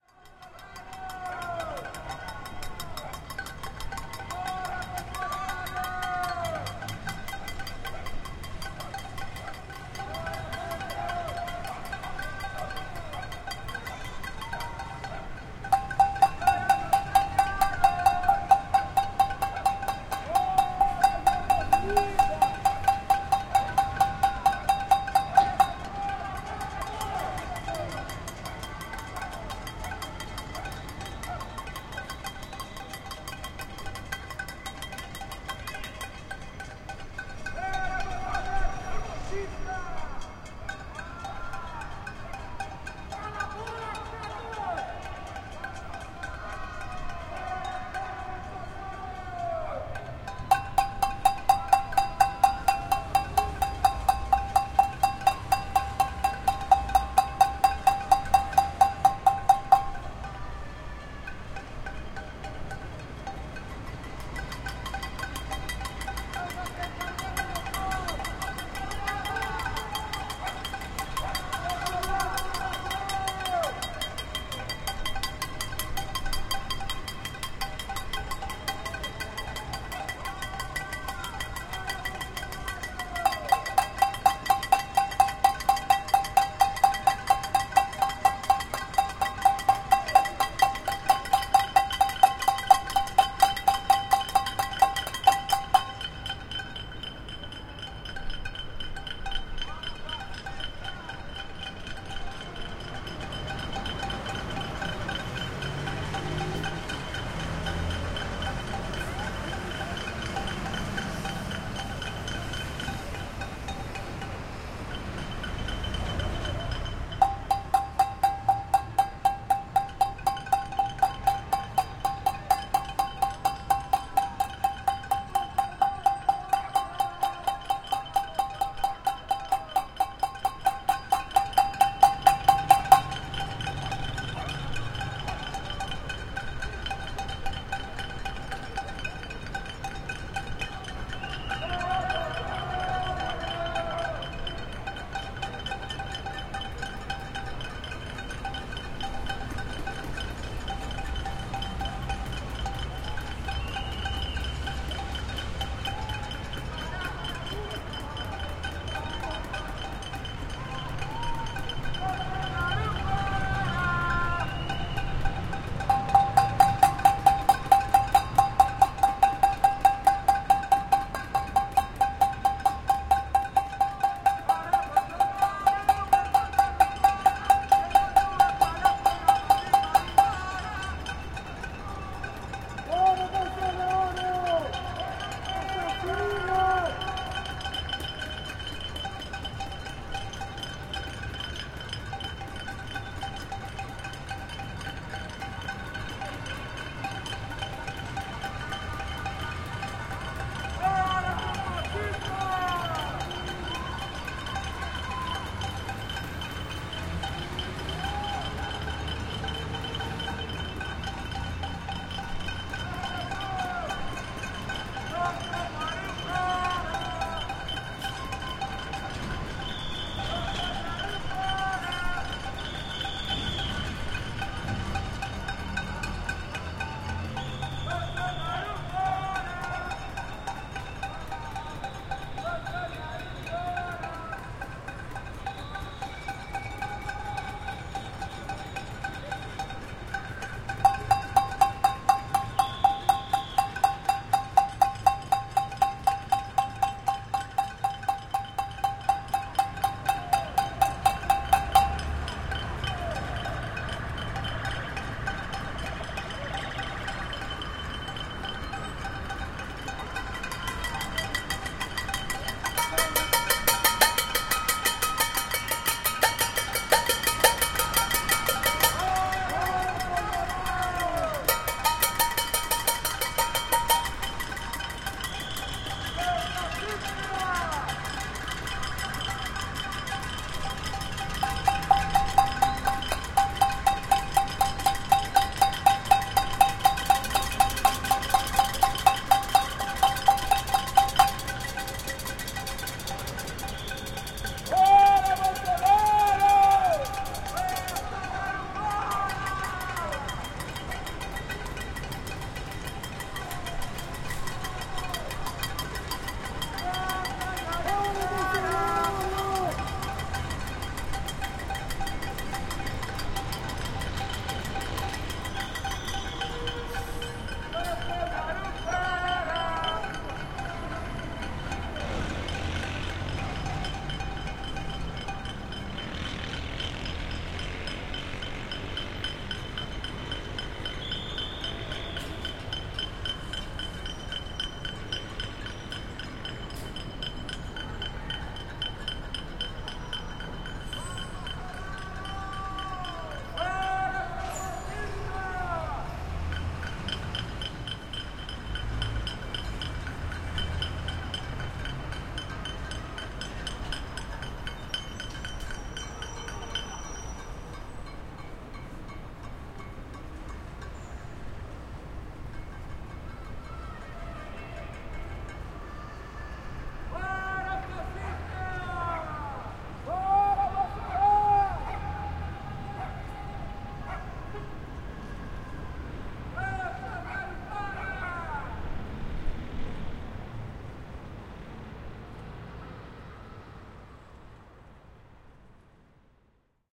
Panelaço Fora Bolsonaro no centro de Belo Horizonte 28/03/20
Against Bolsonaro, people bang pans and scream at the windows of their apartments at night in downtown Belo Horizonte.
12th Day of protests in face of the crisis triggered by the Brazilian president after his actions while COVID-19 spreads across the country.
Recorded on a Zoom H5 Recorder.
corona, fora-bolsonaro, pans